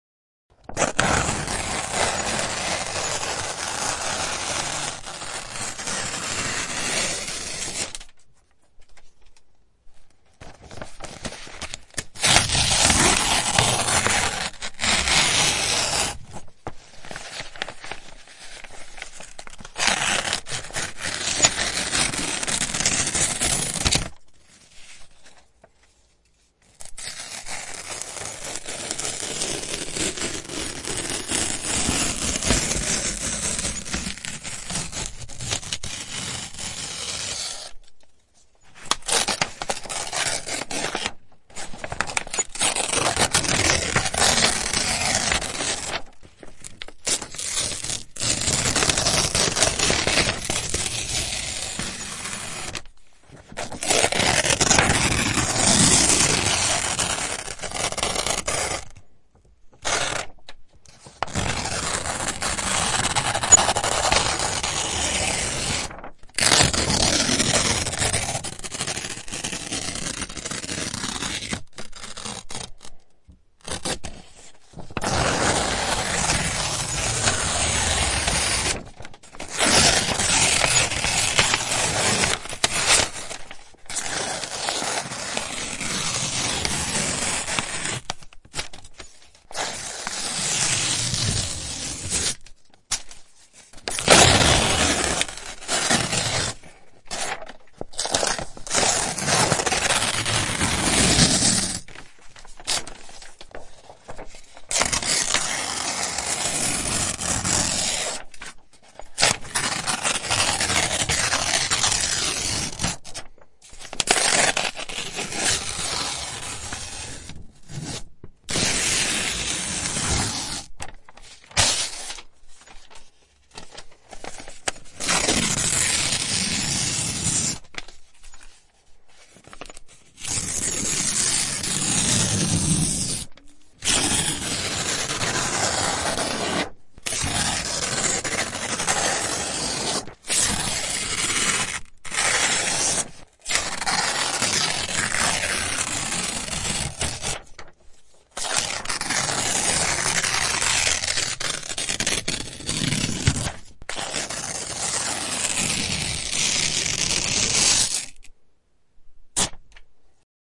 tear papers up. Recorded with Behringer C4 and Focusrite Scarlett 2i2.
up, ripping, paper, tear, tearing, rip